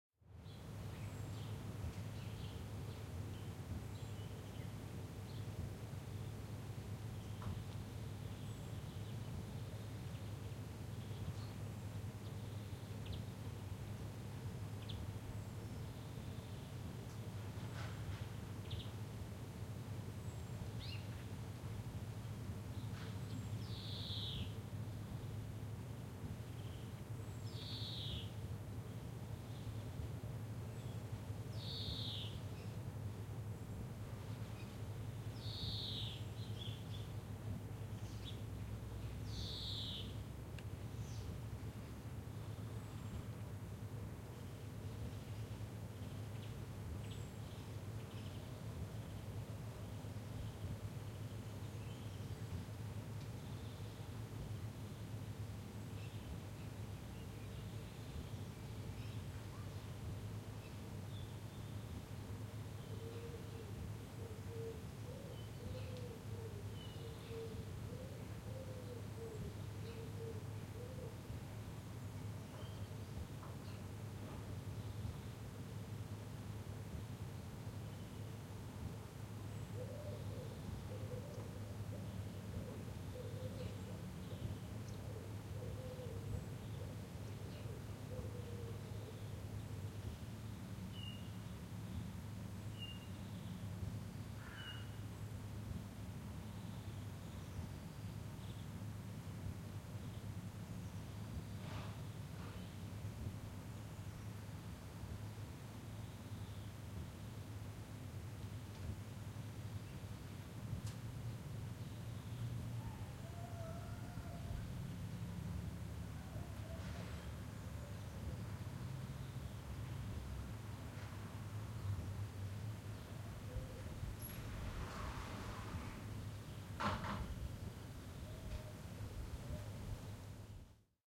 Spring morning atmosphere
When I got up relatively early last Sunday, I took the chance to record the morning soundscape as well....
spring, mood, birds, bird, morning, nature, calm, field-recording, peaceful